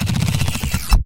Transformer Slowdown 01
Transformer-inspired sound effect created with a contact microphone and a guitar pickup on various materials and machines.
mechanic, robot, sci-fi, movement, electric, tech, sounddesign, effect, future, technology, sound-design, sfx, digital, transformer